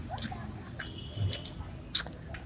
Registro de paisaje sonoro para el proyecto SIAS UAN en la ciudad de santiago de cali.
registro realizado como Toma No 03- pito 3 plazoleta san francisco.
Registro realizado por Juan Carlos Floyd Llanos con un Iphone 6 entre las 11:30 am y 12:00m el dia 21 de noviembre de 2.019